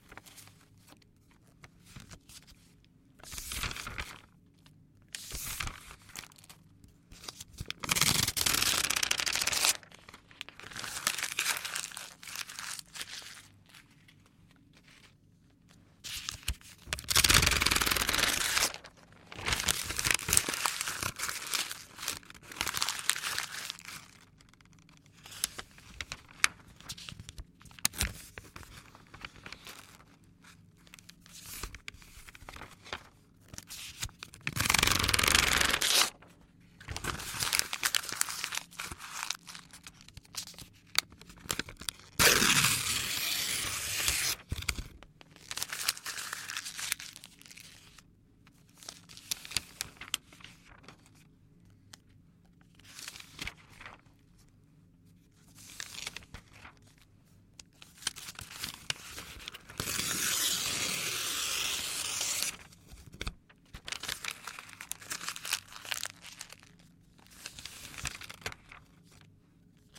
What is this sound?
Efeito sonoro gravado nos estudios de audio da Universidade Anhembi Morumbi para a disciplina de "Captação e edição de Audio" do curso de Radio TV e Internet pelos estudantes: Arthur Eloi, Heverton Oliveira, Joao Lucas Souza, Julia Lelli, Matheus Santanielli, Vytoria Reis e William Brandini.
Trabalho orientado pelo professor Felipe Merker Castellani.